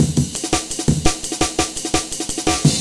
A mangled Amen breakbeat